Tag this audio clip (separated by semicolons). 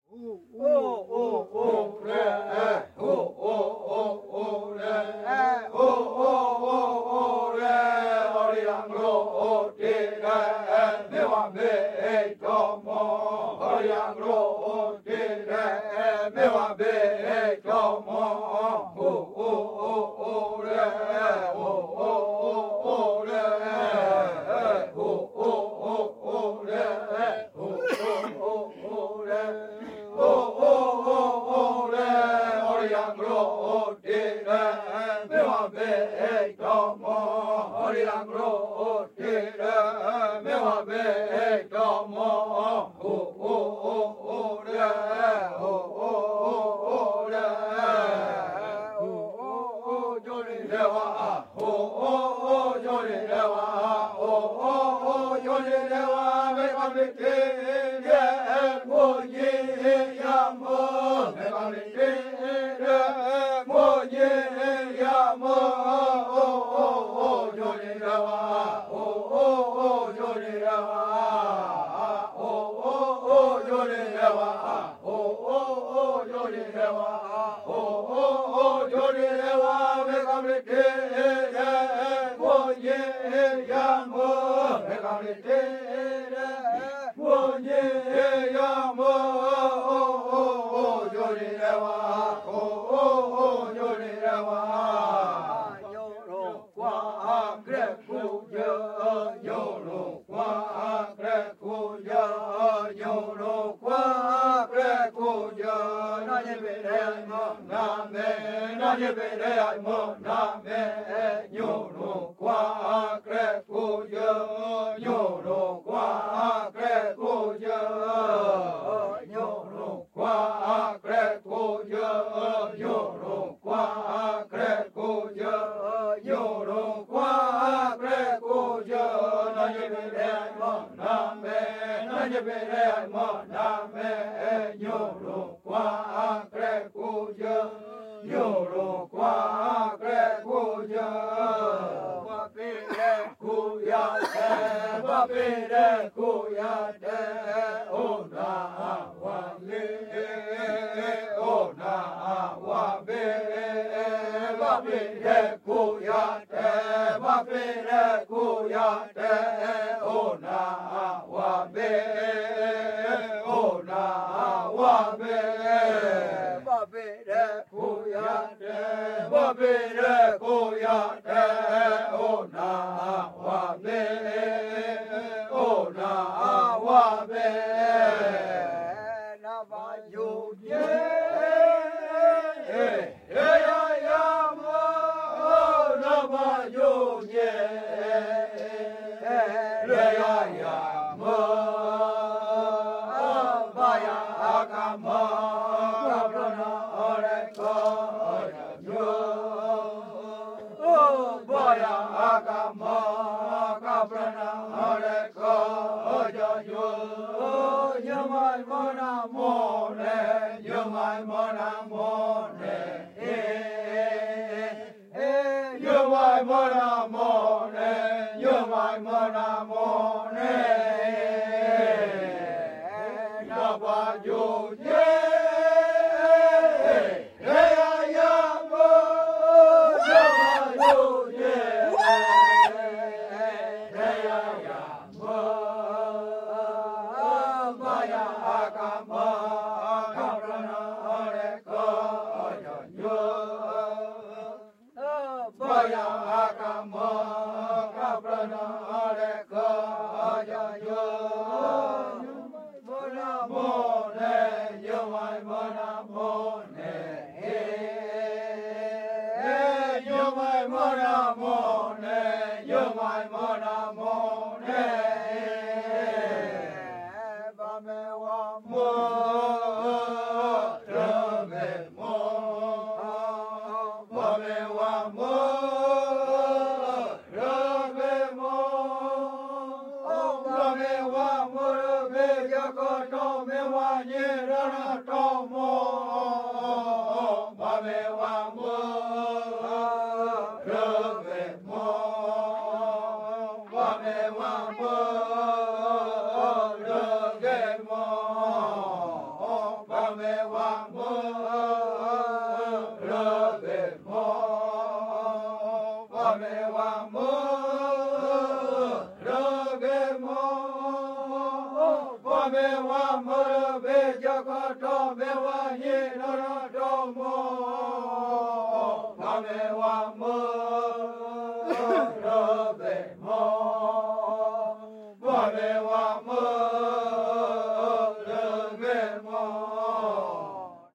indian; amazon; music; brazil; chant; voice; indio; tribal; tribe; field-recording; native-indian; warrior; ritual; brasil; male-voices; caiapo; tribo; rainforest; kayapo